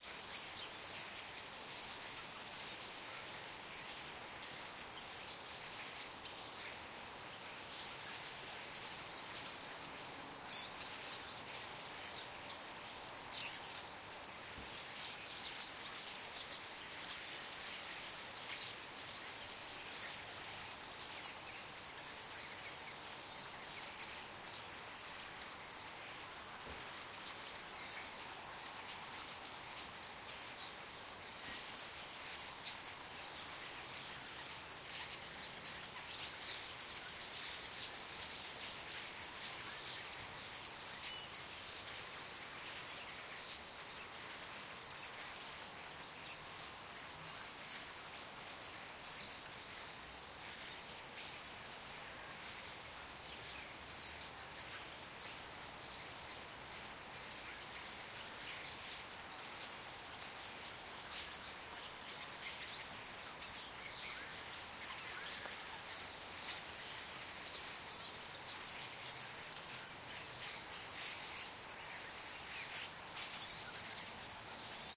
08-birds-at-night-in-trees-in-Hilversum-in-snow
Field recording of a flock of birds (common Starling aka Spreeuw) in a tree amidst snow, close to a train station.
Recorded with a Olympus LS-10